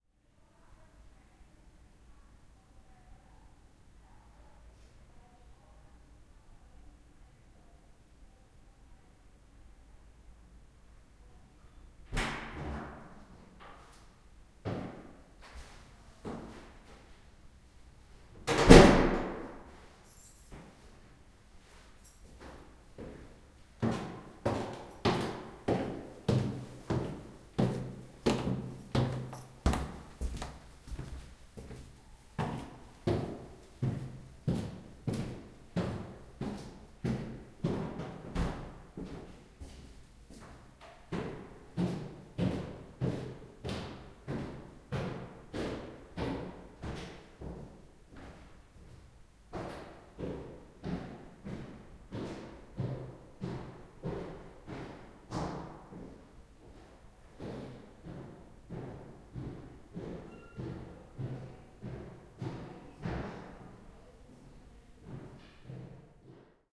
Door opening stairs walking
A quiet staircase between two floors in an old house in Berlin. A woman opens a old wodden door on the upper floor, then closes it and walks downwards. She passes the microphone from right to left and walks three floors down.
old, creaky, footsteps, door, field-recording, squeak, wooden, berlin, germany, opening, stairs, walk, close, squeaking, wood, down, creak, open, german, steps, walking, squeaky, feet, closing, floor, woman, stair, stereo, house, staircase